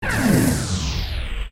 Another weird cheesy laser kind of sound I made.